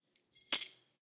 Keys Grab Hard 2
Clean recording of grabbing a set of metal keys